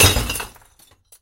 verre brisé broken glass
verre, bris, glass, broken